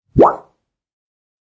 Plastic Wow 4
Sound of plastic rectangle.
plastic, plastic-rectangle, sound-of-bending-plastic